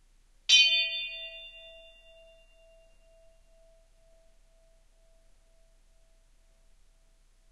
This version is 40% slower than the original. Edited in Audacity 1.3.5 beta
bell, bing, brass, ding